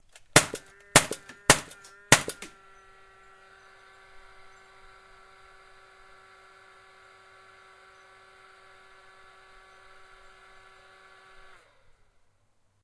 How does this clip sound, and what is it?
industrial nailgun 4shots fast extendedmotor
nail gun shooting 4 quick shots into open space, with motor left to idle.
click, gun, motor, nail, shooting, shot